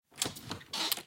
ABRIR PUERTA
madera, puerta, abrir